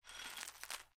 CEREAL SOUNDS - 56
clean audio recorded in room ambience
cereal, foley, breakfast, milk